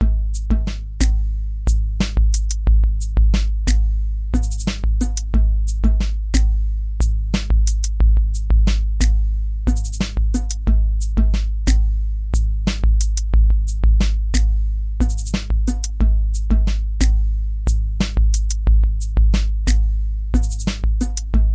mpc beat loop